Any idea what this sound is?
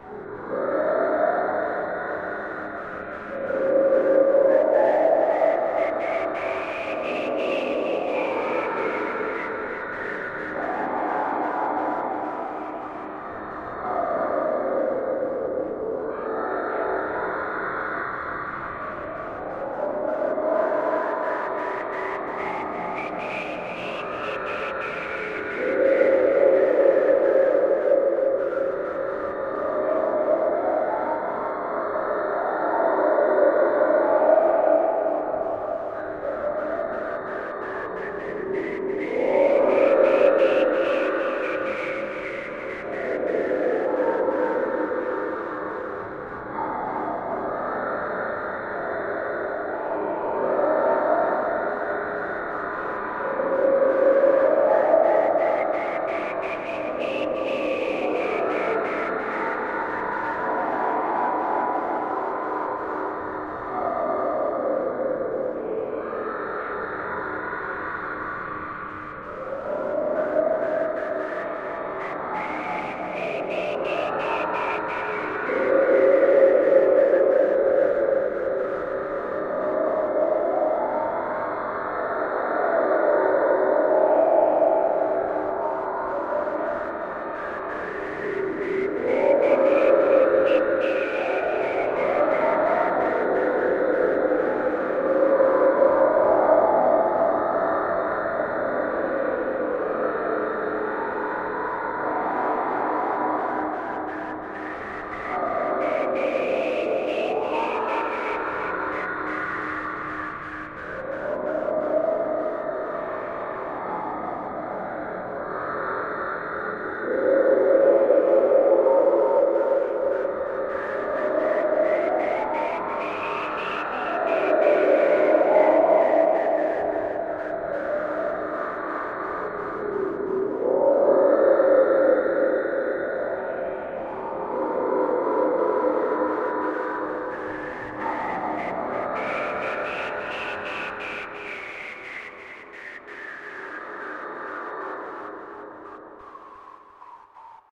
A sort of low-pitch breathing-like sound of some big thing lurking in the deep, useful for background ambient on some kind of scary place.
All components of this were made from mixing various effects together in FL Studio.
ambient, breath, dark, deep, horror